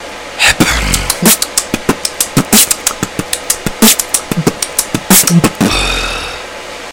generic beatbox 3
3, beatbox, dare-19, generic